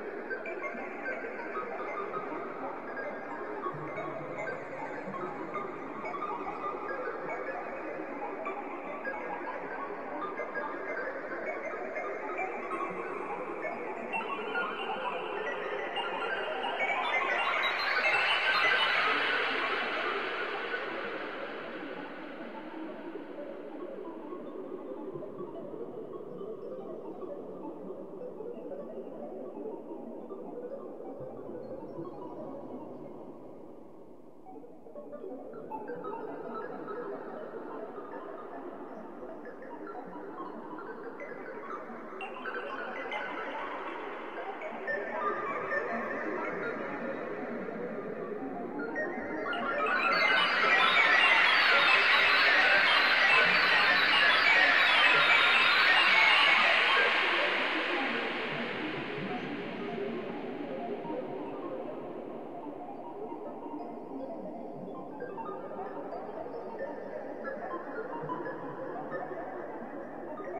Computer beeping atmosheric noise.
robot, Computer, beep